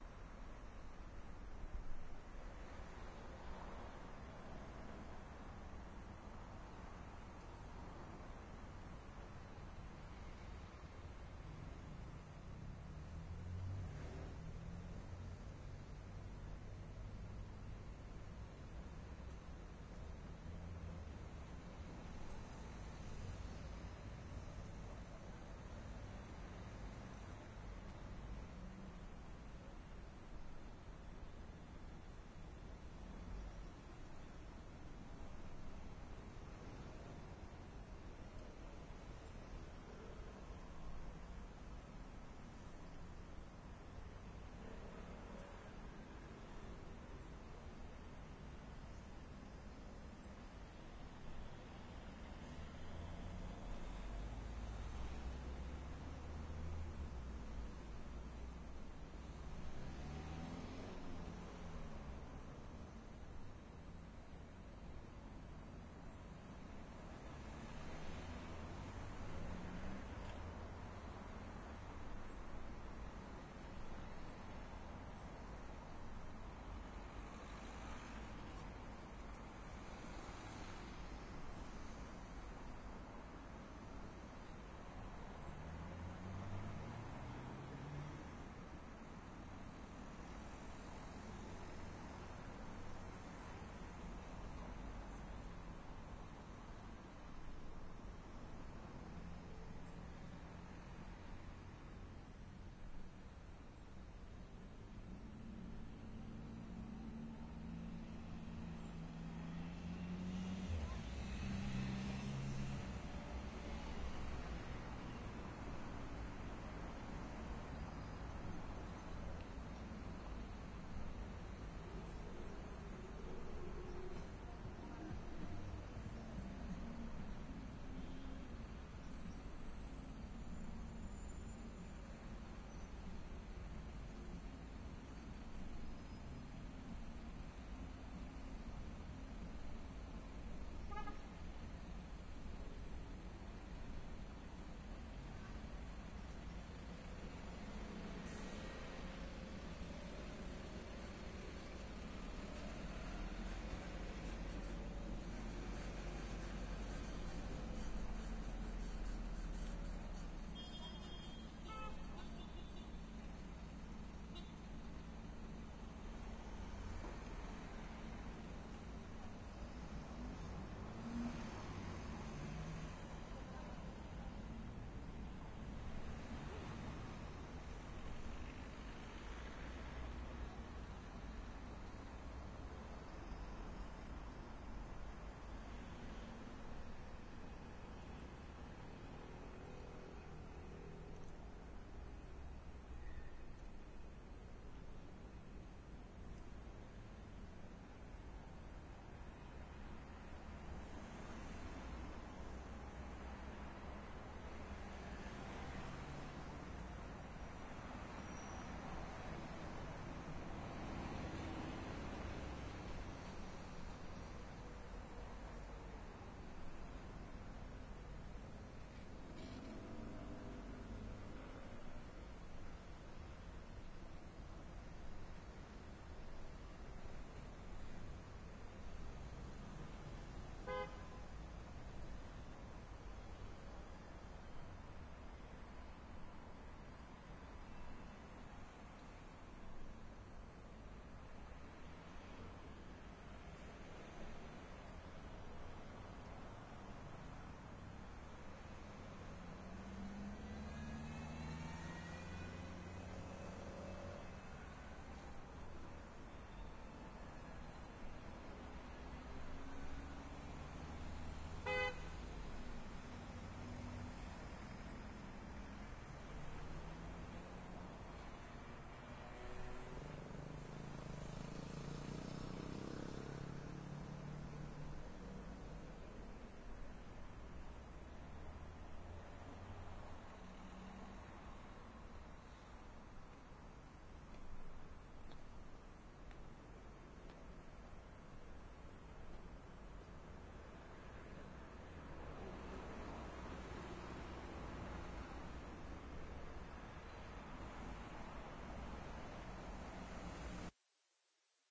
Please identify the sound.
Street of Toulouse, France.
soundscape, ambient, field